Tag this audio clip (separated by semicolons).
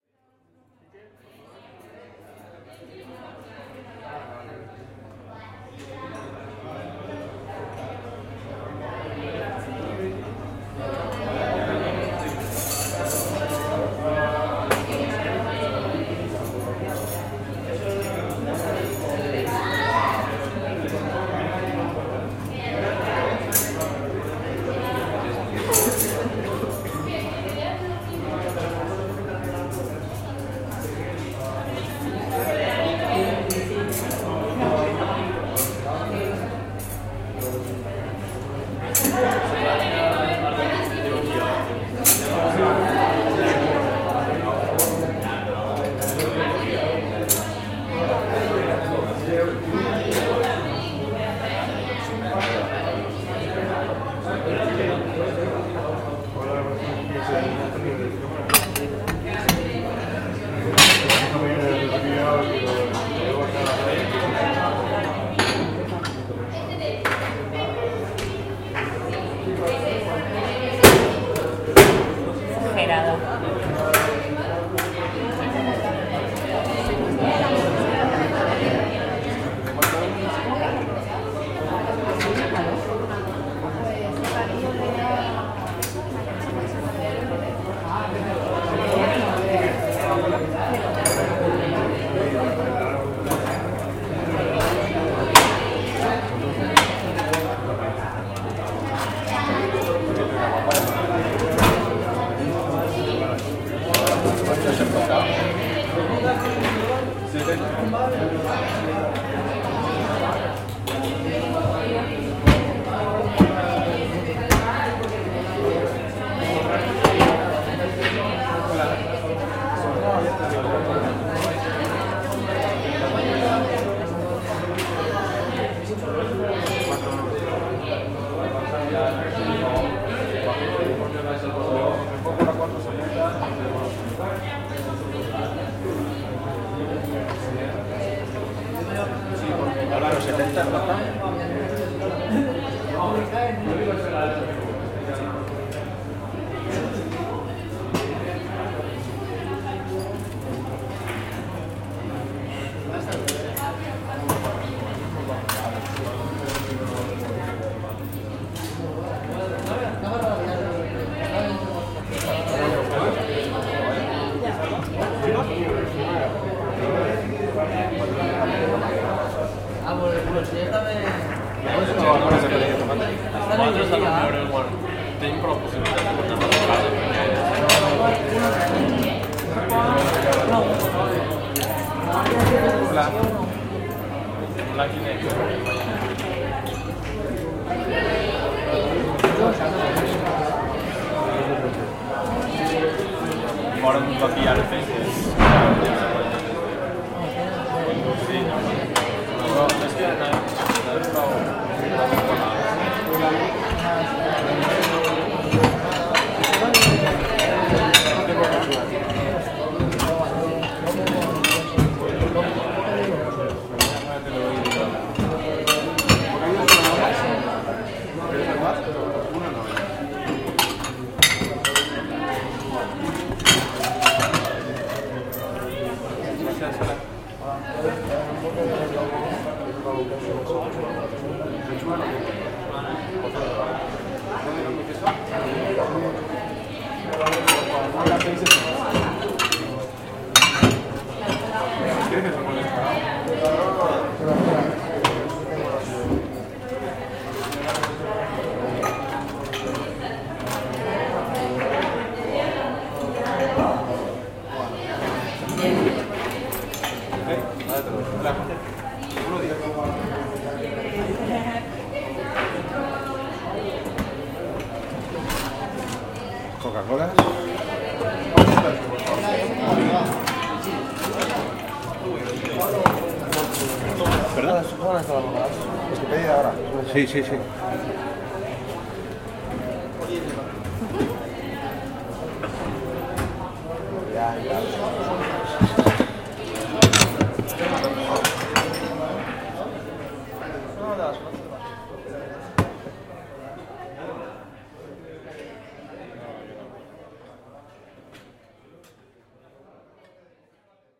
ambient; ambience; vessels; soundscape; cafe; people; cash; lunch; cafeteria